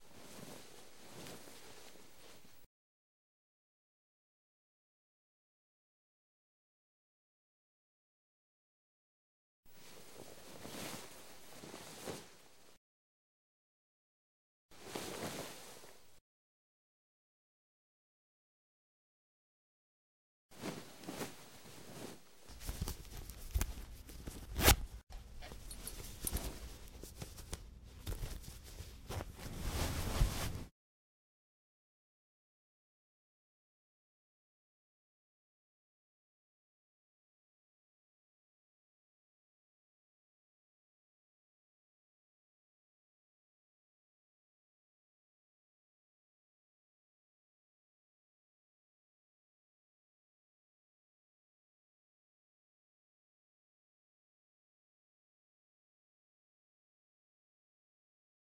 Clothing foley FX performance
sexy-time foley performance from the movie "Dead Season."